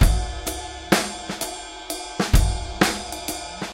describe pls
trip hop-11
trip hop acoustic drum loop
loops, drum, acoustic